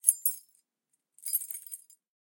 Keys Jingling 1 6
Rattle, Keys, Door, Sound, Lock, Jingling, Design, Real, Jingle, Recording, Foley, Key